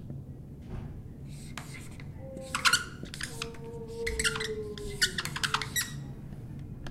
Marker on a white board
squeaking, marker, white-board, office